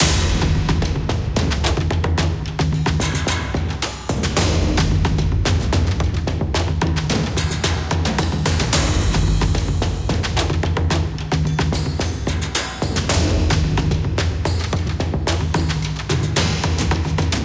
Action Percussion Ensemble 1 The Division (110 BPM)
Action Percussion Ensemble Inspired from The Video Game "Tom Clancy's The Division".
loop, action-percussion, drums, percussion-loop, 110-bpm, hollywood, rhythmic, cinematic-percussion